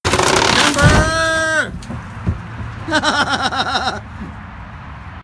timber-chuckling
A man yelling "Timber!", a wooden structure falling over, and a chuckling laugh.
timber, wood, male, man